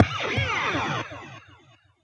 Please refer to the first sample in this sample pack for a detailed description of how the samples were generated.Once I had the basic Amp and gate setup created in Ableton Live 7, so I could grab the tail portion of the sound which I was after, I moved on to abusing these sounds through a variety of sound processing techniches.Adding some delay and feeding it back to the amp created this sort of phaser effect.